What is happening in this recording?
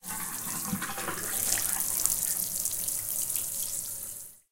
Showering hands. Recorded using M-Audio MicroTrack 2496.
you can support me by sending me some money:
wash, aqua, flap, shower, stereo, splash, water, liquid, bubble, purl, douche, tub